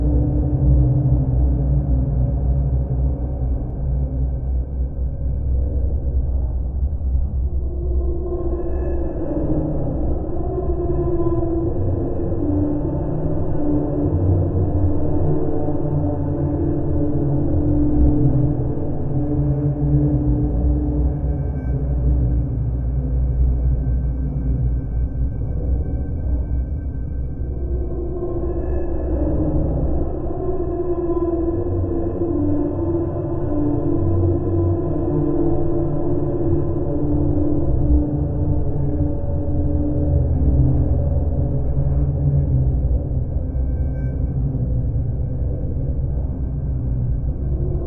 A spooky drone sound. To keep the kids up at night.
ambient, creepy, dark, drone, spooky